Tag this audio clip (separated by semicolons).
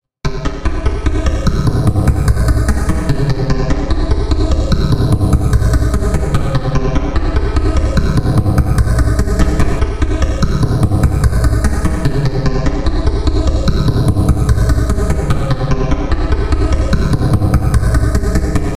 horror; horror-fx; horror-effects